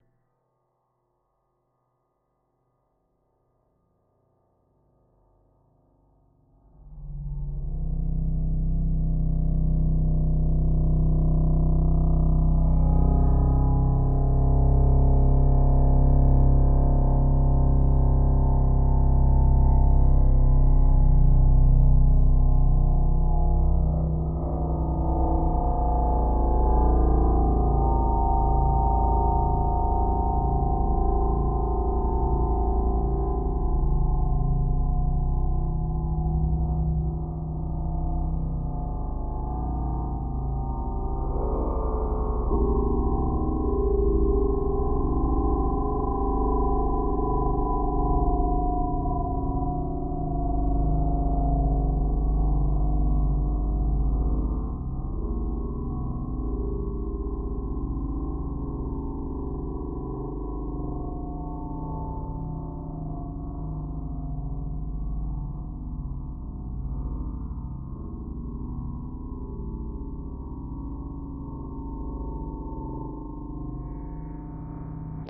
Intense Dark Noise
This was made from a 2-second stutter on a voice recording I was doing. I pulled it and played with it, looking for the various ways I could manipulate it. At one point it started sounding spooky and a bit dramatic. I kept going with it, and produced this.